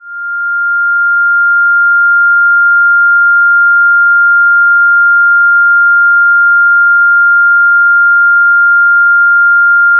A wave remanifesting the "sound" of a tinnitus. Created in Adobe Audition.
noise, ear, tinnitus, loop, whistling, ringing